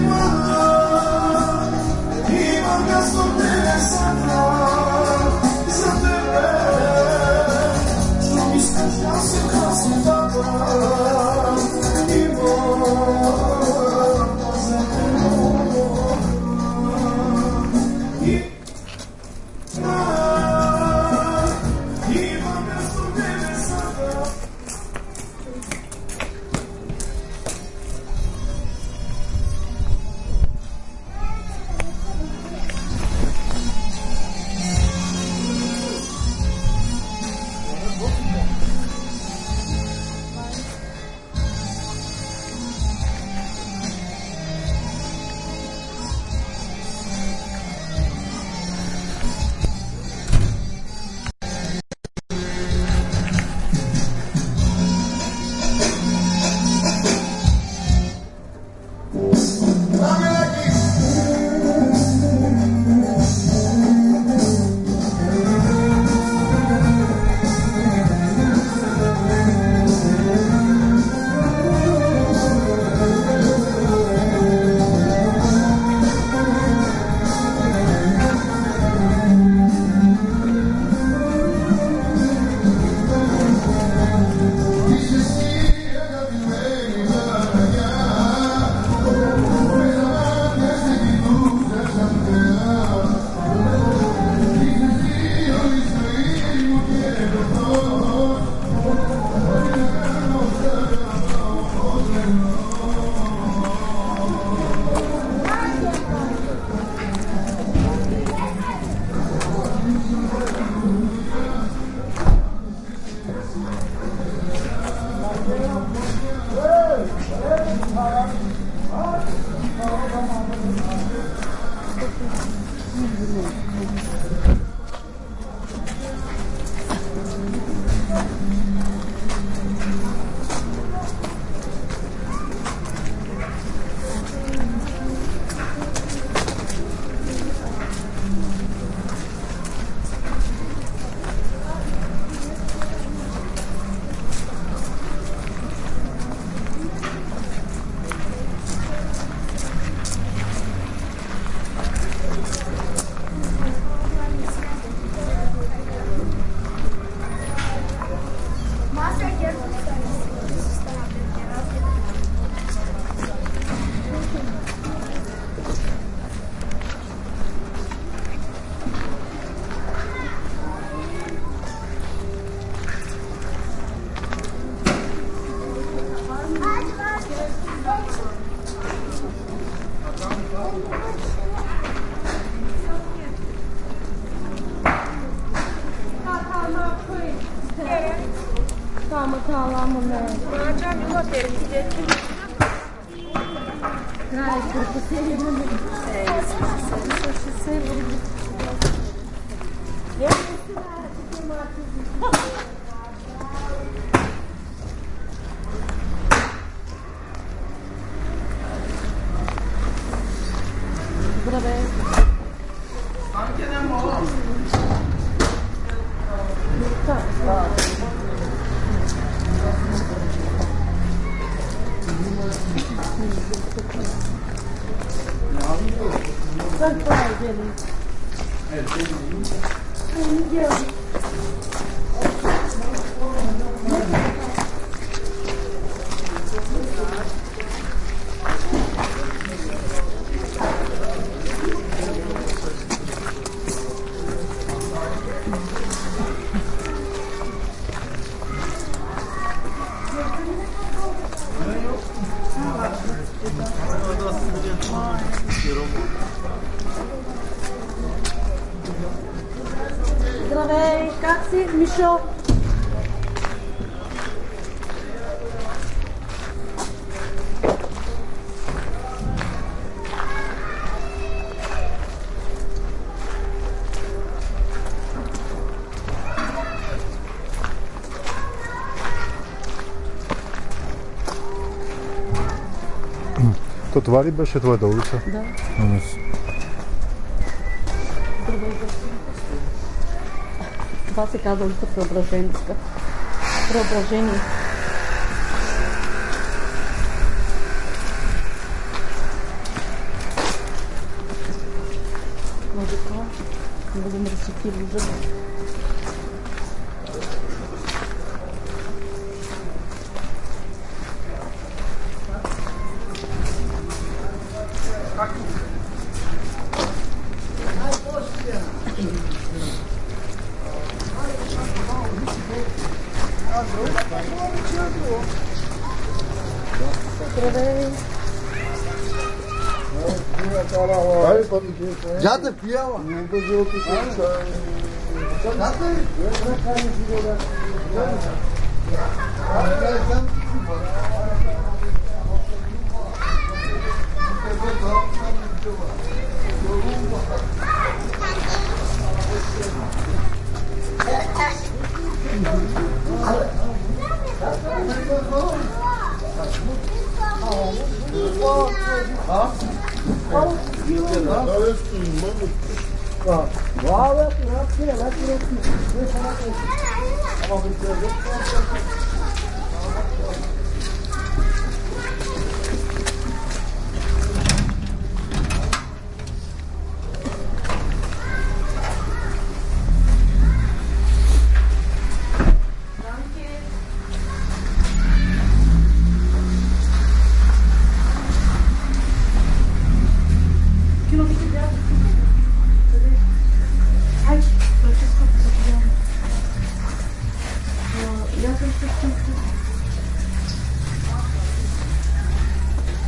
street sounds plus music 2
Nadezhda district, Sliven, Bulgaria
This is an important Roma "Ghetto" in Bulgaria.
Language, Gypsy, Culture, Ghetto, Bulgaria, Street, Roma